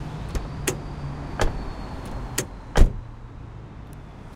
car door shut and open
car, shut